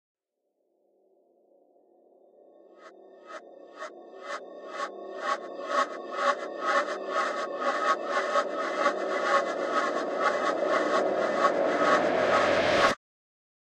Psycho Metallic Riser FX
Este audio es muy útil para producciones de terror, horror y thrillers que involucren una psicosis o efecto de desorientación por parte de un personaje. Se caracteriza por tener una automatización en la panorámica con varias repeticiones extrañas que dan el efecto de una locura pasajera. Además, posee un efecto Riser al final que contempla el climax de la escena y atrapa ese ambiente de suspenso.
FX, Horror, Psycho, Riser, SFX, Weird